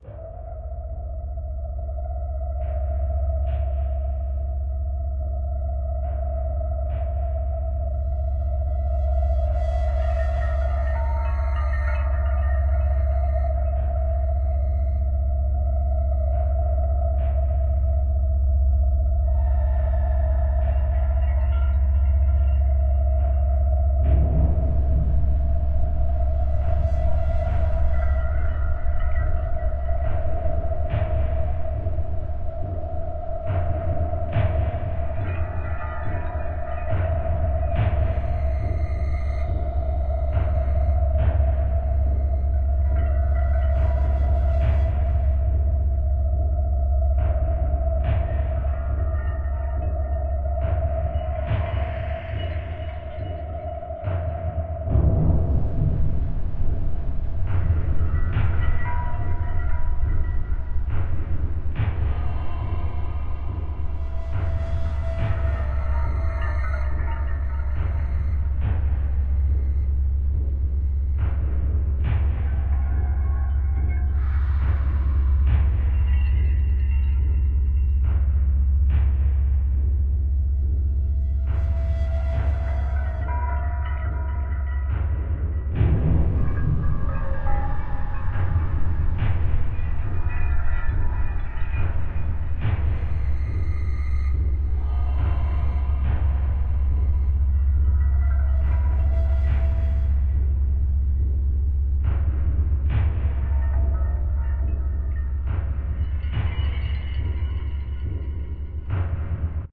Atmosphere - Horror 1 (Loop)
A little horror-atmo i made with cubase 7. If you wanna use it for your work just notice me in the credits. So have fun with it.
For individual sounddesign or foley for movies or games just hit me up.
Edit:
Over 12000 Downloads! Never thought so many people would use this.
16bit, Ambient, Atmossphere, background, Creepy, cry, dark, dissonant, distorted, drum, drums, far, fear, game, Horror, loop, monster, movie, rumble, Scream, sfx, slow, synth, terrible, tom, toms, video, wave